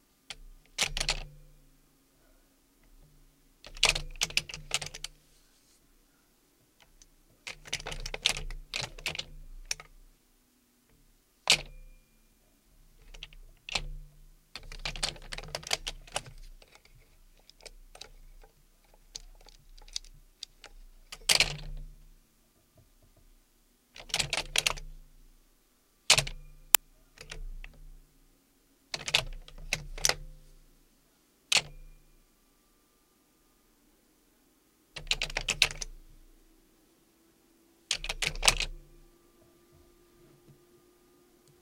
rattling old phone
This is the sound of an old brass and bakelite Bell telephone being picked up, rattled and put down again.